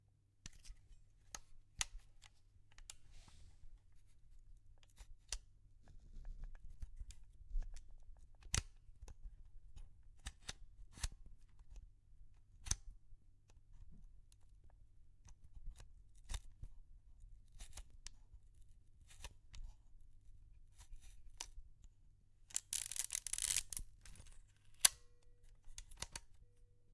Old SLR film camera handling sounds
Handling sounds of an old SLR film camera.
Recorded with Rode NT1-A microphone on a Zoom H5 recorder.
35mm, advance, analog, antique, camera, change, click, detail, dial, exposure, film, handle, iris, lens, lever, manual, mechanical, metal, movement, moving, old, photo, photography, ring, set, shutter, slr, still, vintage